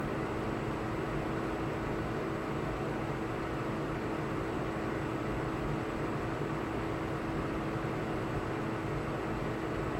RYOBI Fan
The sound effect of the RYOBI P3320 fan at high speed.
Recorded using my MacBook Pro Microphone!
Sound ID is: 593898